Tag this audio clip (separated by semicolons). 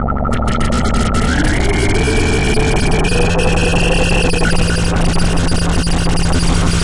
analog; benjolin; circuit; electronic; hardware; noise; sound; synth